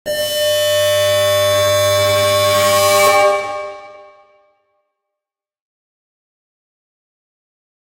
An electric saw in a big hall. Created in Virtual Waves!